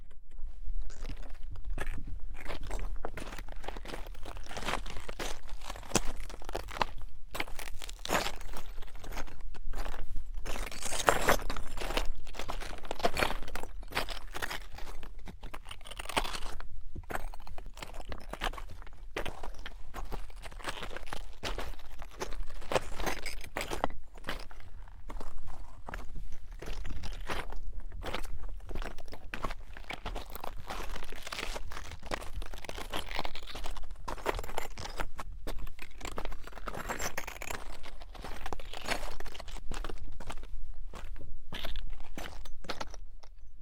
Walking on lava cinders. It was outside and a bit windy, so perhaps not the cleanest sound, but some interesting texture in there. Recorded with an AT4021 mic into a modified Marantz 661.
cinder, clink, foley, rocks, step, outside, lava, walk